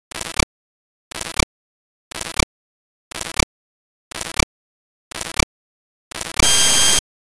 Here is a strange glitch that I received after a mate gave me his animation project.
I don't know where it comes from, I hope it will help someone in his sound design project
glitch, noise, electronic, freaky